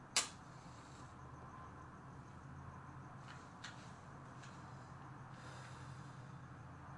Ambient Cigar (Ambient, Omni)
fire, omnidirectional, school-project